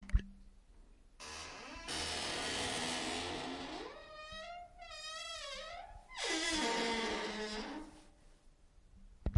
My dryer door creaking recording from my zoom h1.